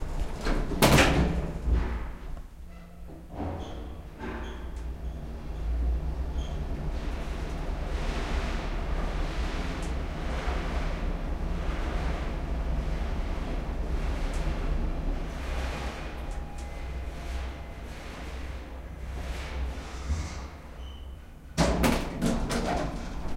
Elevator going down 2
A large (12 person) elevator located in an apartment building that was built as a hotel for the 1972 Olympic Games in Munich, Germany. There are four of these in the building, and they all rattle like nobody's business.
In this recording, in the beginning the doors close. Then the elevator travels down about ten floors with concerning rattling noises. The elevator is going to the ground floor so the ground floor chime can be heard as it stops. The recording ends abruptly because there were people in the lobby.
close, door, elevator, elevator-door, elevator-ride, lift, metal, open, Rattle, sliding-door